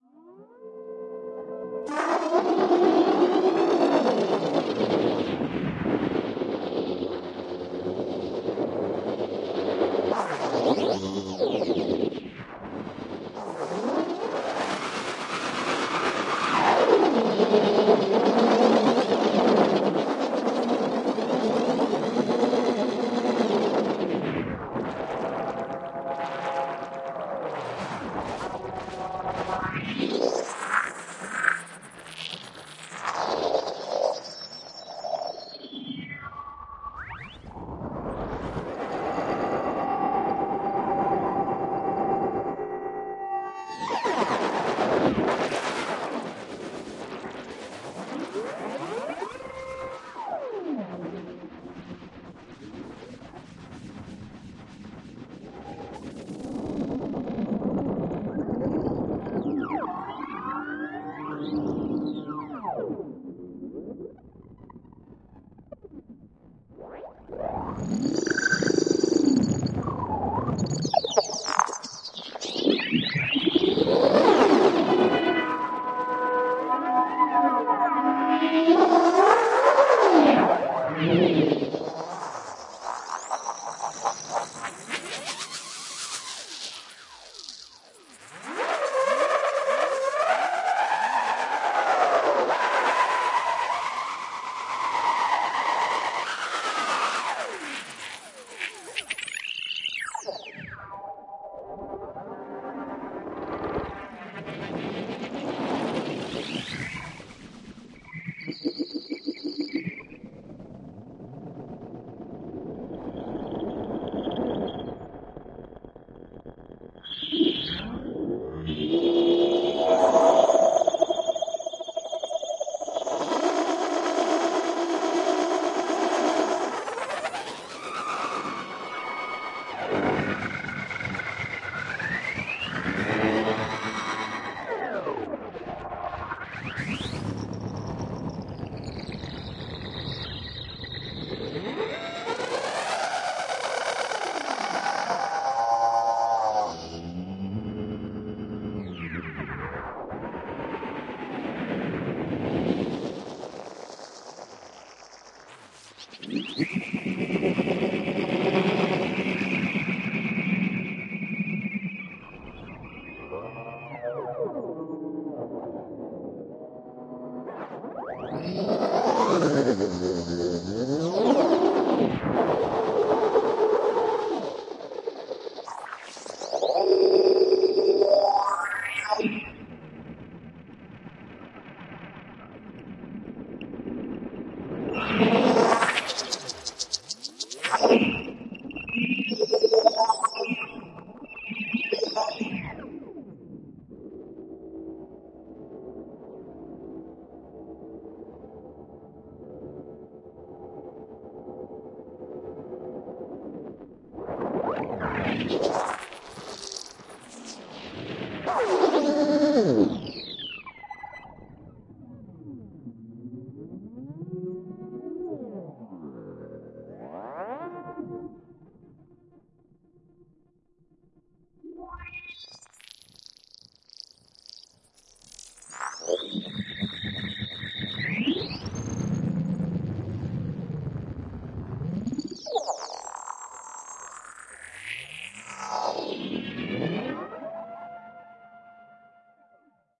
ESERBEZE Granular scape 28
16.This sample is part of the "ESERBEZE Granular scape pack 2" sample pack. 4 minutes of weird granular space ambiance. Feedback from a badly tuned radio on Mars in 2341.
drone, effect, electronic, granular, reaktor, soundscape, space